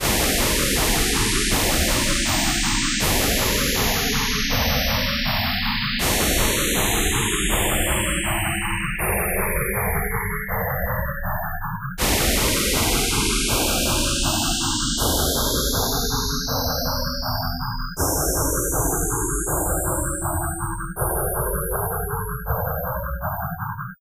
loopster6stereo
Sequences loops and melodic elements made with image synth.
loop, space